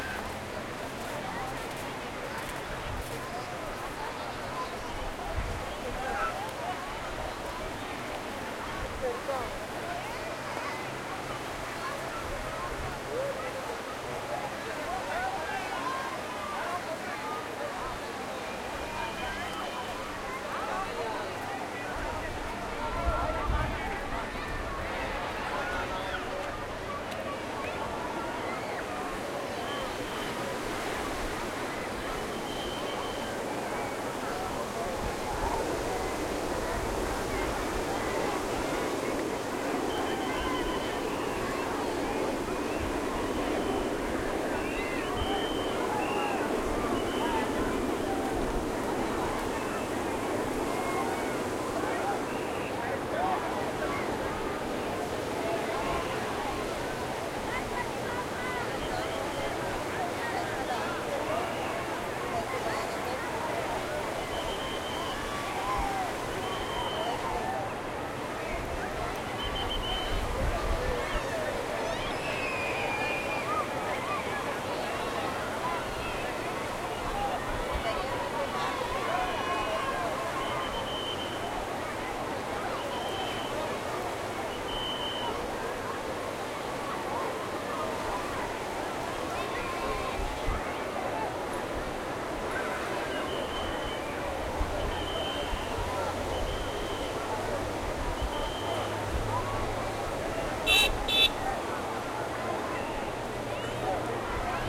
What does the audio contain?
Ganpati celebration people nearby beach ocean surf voices kids distant police whistles India
surf, police, Ganpati